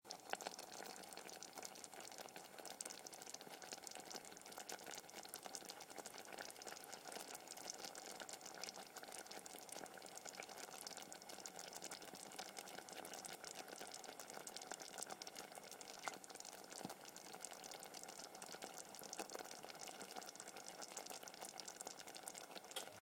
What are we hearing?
Thick liquid bubble 2
bubbling, thick liquid
boiling, water, bubble, bubbling, chemical, potion, boil, liquid, bubbles